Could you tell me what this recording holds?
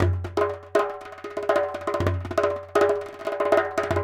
Recording of my personal Doumbek 12”x20” goblet hand drum, manufactured by Mid-East Percussion, it has an aluminum shell, and I installed a goat-skin head. Recording captured by X/Y orientation stereo overhead PZM microphones. I have captured individual articulations including: doum (center resonant hit), tek (rim with non-dominant hand), ka (rim with dominant hand), mute (center stopped with cupped hand), slap (flat of hand), etcetera. In addition I have included some basic rhythm loops which can be mixed and matched to create a simple percussion backing part. Feedback on the samples is welcome; use and enjoy!